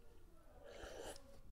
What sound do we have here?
Recorded on a Zoom H2, drinking water from a solo cup.
H2, Cup, Water